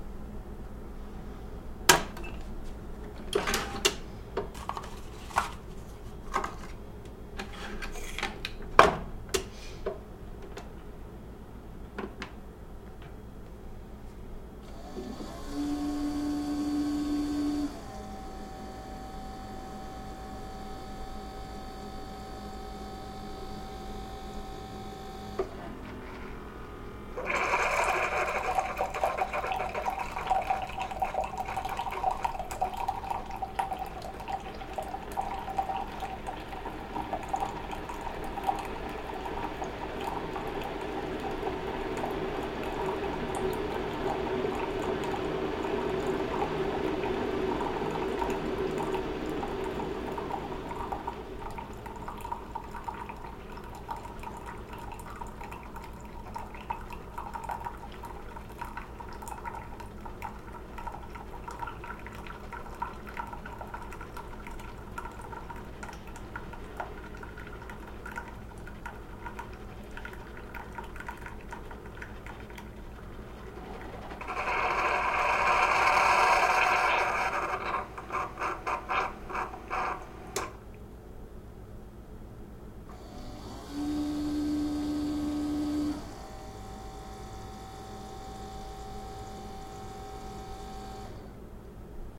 This is the sound of setting a ceramic mug on the metal plate of a Keurig coffee maker, hitting "brew" and letting it do its magic. Recorded with a boom microphone onto a P2 card via a Panasonic HVX200 digital video camera.